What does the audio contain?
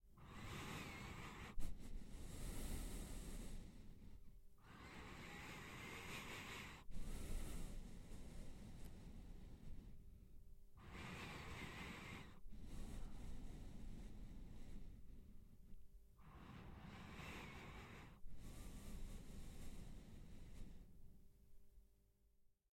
Nose Breathing, close mic. Studio
breathing, close, nose